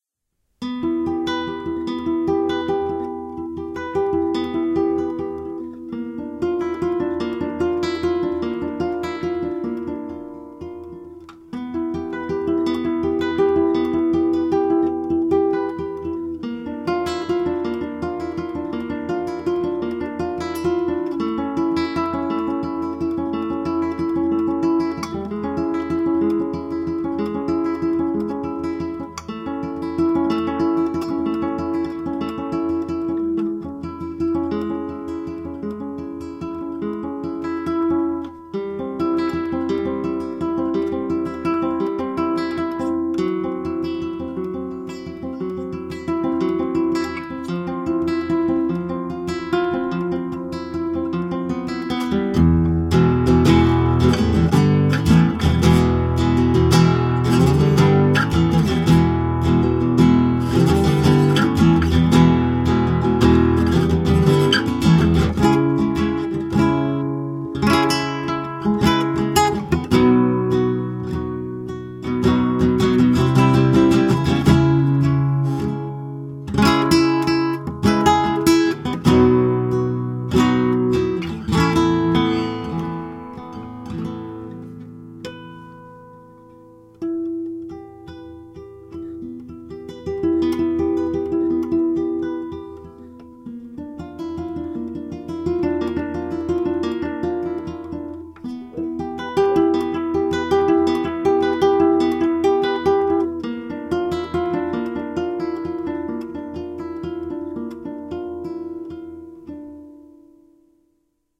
Ambient Acoustic
Recorded with Zoom H2 using front-facing 90 degree x/y on-board mics on mid-level gain setting at a distance of about 6 inches. Guitar is a 1960's Spanish nylon-string classical flat-top guitar made by Kawai.
Model is a G-500 Kawai: Made in Hamamatsu, Japan between 1960 and 1964 (exact year unknown)
Nice sound for background ambience.
acoustic, ambient, classical, guitar, improvisation, nylon, string